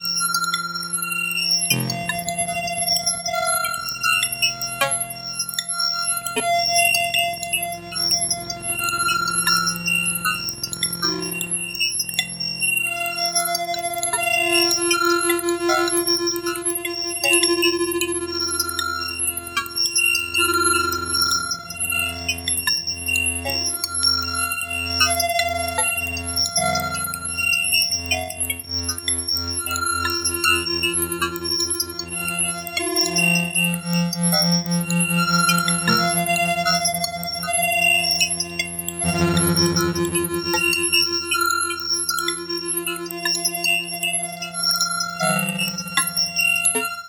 Timex Seq
A rich, complex rhythmic sequence including various synthetic plucked sounds. Sample generated using a Clavia Nord Modular and then processed with software.
Pluck, Pattern